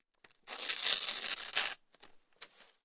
tearing paper 16
This is a sample from my sample pack "tearing a piece of paper".